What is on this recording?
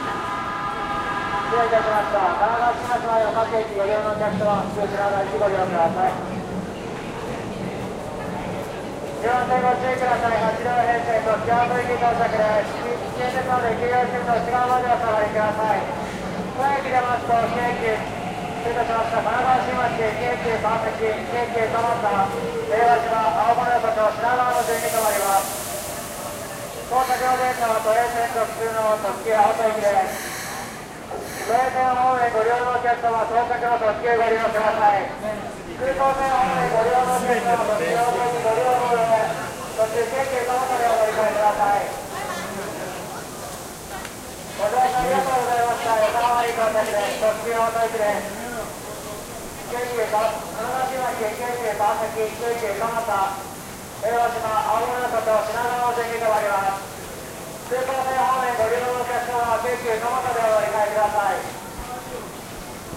Recorded at Yokohama station platform during transport collapse due to disruption of JR Keihin Tohoku line. Big crowd of people could not make back to Tokyo from the Yokohama fireworks display. Sounds of public announces, people walking and talking, trains departing. Recoded 4th of August 2015 Olympus DS-750, no editing